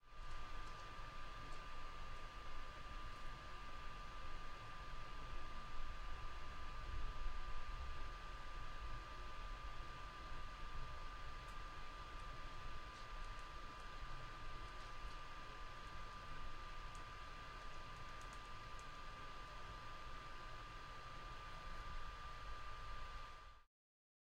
Ventilation in an old laptop.